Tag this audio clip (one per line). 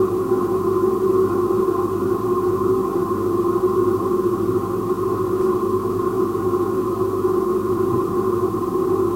cold
compressor
freeze
fridge
refrigerator
storage